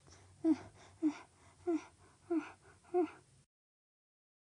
persona desesperada, que acaba de pasar por un suceso no lindo
asustado, desespero